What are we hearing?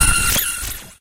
STAB 088 mastered 16 bit
Electronic percussion created with Metaphysical Function from Native Instruments within Cubase SX. Mastering done within Wavelab using Elemental Audio and TC plugins. A weird spacy short electronic effect with a lot of high frequency content for synthetic soundsculpturing.
electronic, percussion, stab